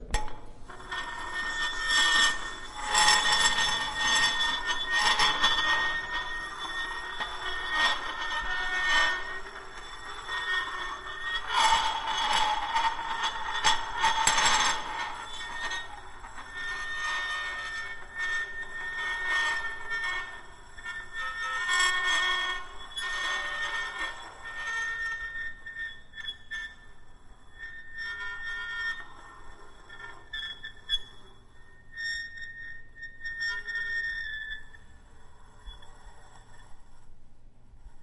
glass scraping ST
scraping glass ,on stone floor
glass, irritating, broken